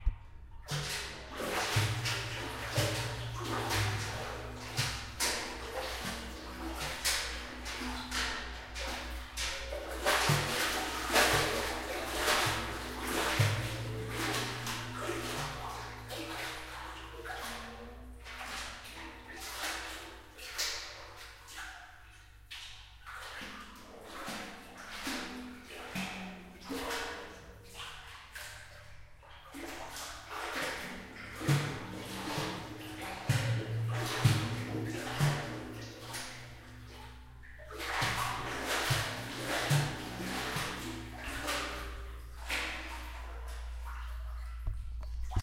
Recorded by H1 in the well (top)